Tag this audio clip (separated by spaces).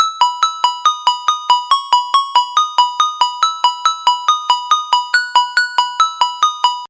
mojo-mills free tone jordan mojomills ring-tone phone ring 8va cell 3 mills happy cell-phone ring-alert 06 alert mono